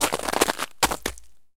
footsteps - ice 06
Walking on a pile of ice cubes while wearing mud boots.
crunch, ice, cold, walk, walking, footsteps, snow, feet, winter, water, crunching, footstep, steps, crunchy